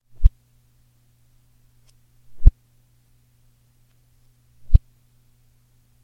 swing ruler reversed
swiping a ruler up and down really fast (pitch manipulated)
manipulation, MTC500-M002-s14, pitch, ruler